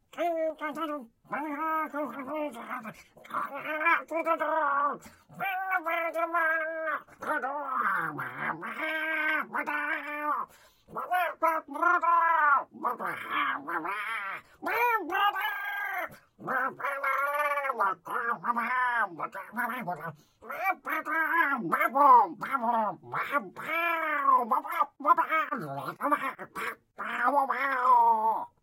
goblin cheer
Onlooking Goblins cheering in a fight. Recorded on Zoom H2, only effect: raised voice by three semi-tones.